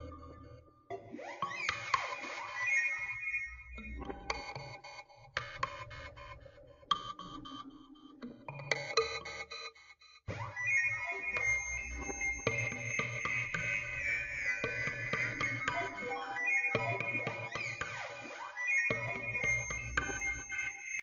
kalimba home made with some delay
instrument, ambient, kalimba, home, delay, made